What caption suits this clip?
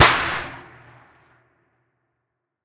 This is a gunshot from a series of 4 created using only household objects and myself.